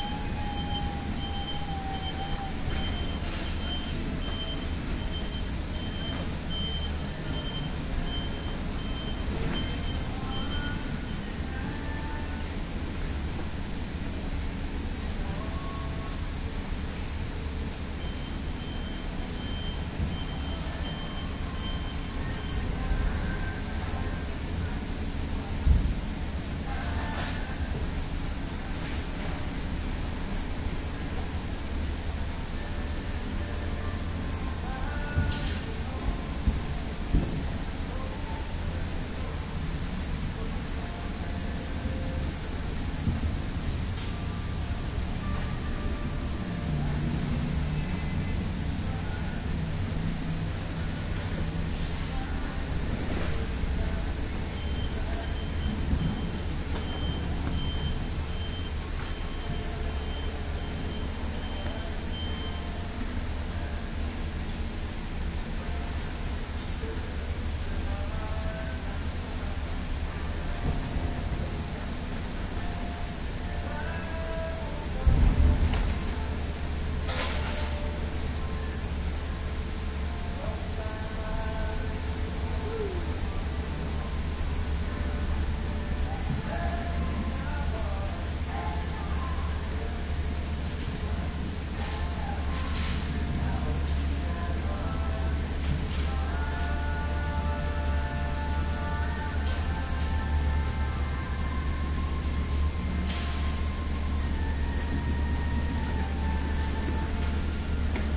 Plant Nursery Greenhouse, Customers, Construction
The interior of a large greenhouse, with customers browsing plants, and workers maintaining the exterior.